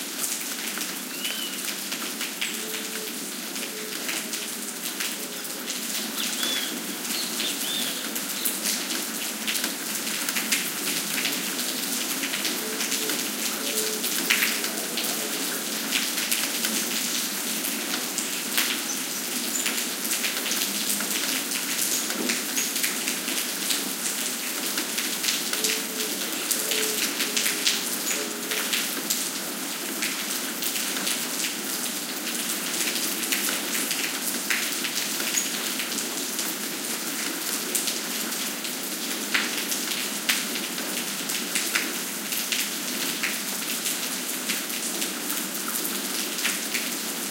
20160309 01.rain.n.birds
Noise of rain on pavement + bird callings. Soundman OKM capsules into FEL Microphone Amplifier BMA2, PCM-M10 recorder. Recorded near Puerto Iguazú (Misiones Argentina)
birds, field-recording, forest, rain, water